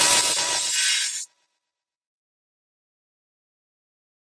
Cisum Imaging-Glitch 2
Quick electronic transitional effect
broadcasting, effect, imaging, sound